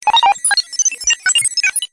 Computer sounds accepting, deleting messages, granting access, denying access, thinking, refusing and more. Named from blip 1 to blip 40.
blip, computer, sound